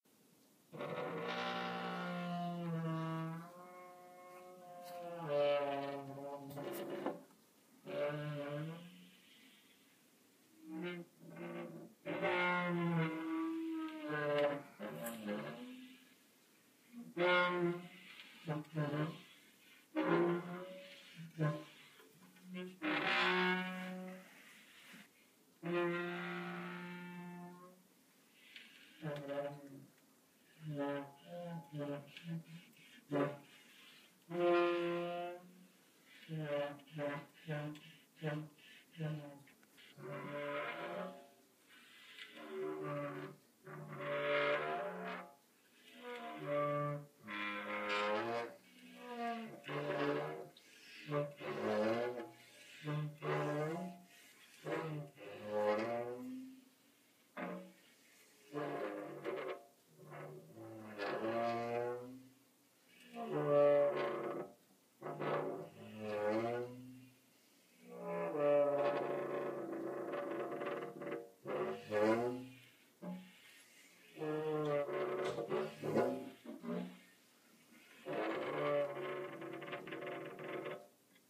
A small glass door being opened and closed causing the hinges to squeak and squeal.